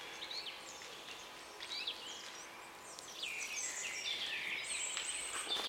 I recorded an atmosphere of birds singing in the forest.
forest, sing